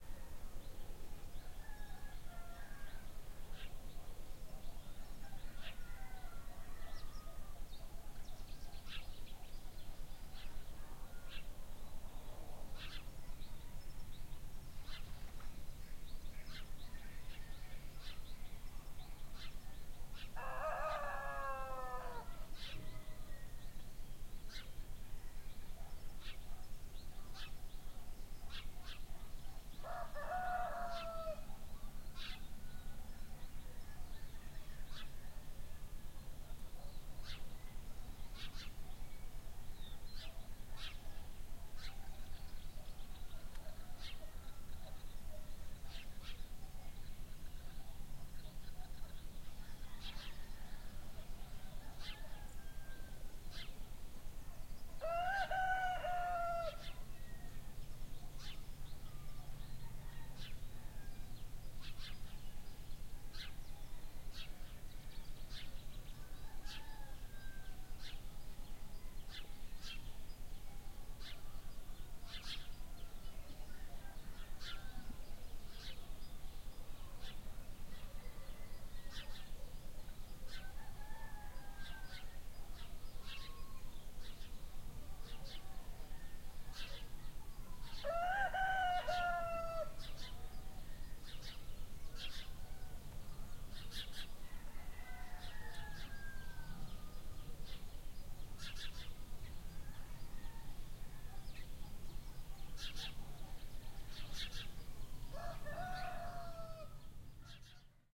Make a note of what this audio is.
Early morning roosters ambience
crow, cock, rooster, ambience, morning, field-recording